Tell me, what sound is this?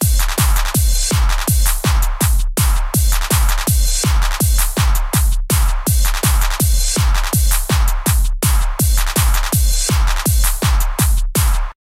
Drum loop 5
Tempo is 82(or 164). Used these in a personal project. Made with CausticOSX.